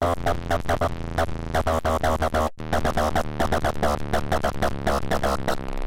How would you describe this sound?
A rather noisy and rhythmic patch created with a Nord Modular synth. Lots of self modulation and feedback created this. Slides down in pitch over time.